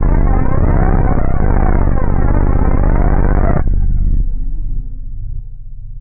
THE REAL VIRUS 12 - FUZZBAZZPHLANGE -C0
This is a fuzzy bass sound with some flanging. All done on my Virus TI. Sequencing done within Cubase 5, audio editing within Wavelab 6.
bass, flange, lead, multisample